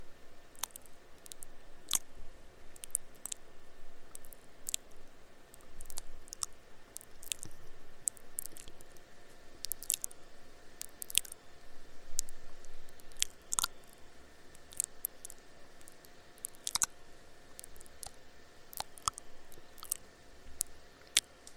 Sticky Sounds
Various sounds of sticky textures, could be used for footsteps etc.
Foley, Footsteps, Mouth, Old, Sticky, Trudging, Wet